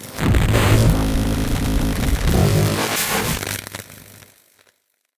Electric Shock 6
Sounds developed in a mix of other effects, such as electric shocks, scratching metal, motors, radio and TV interference and even the famous beetle inside a glass cup.
Electronic, Mechanical, Sci-fi